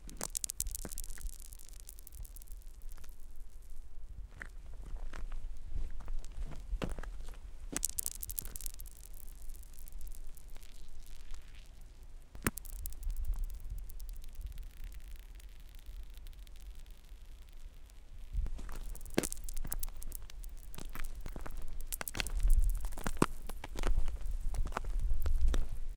Kicking small bits of ice on a frozen lake. Probably good for granular etc.
frozen, granular, Ice, lake